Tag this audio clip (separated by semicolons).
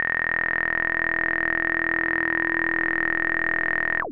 multisample; square; triangle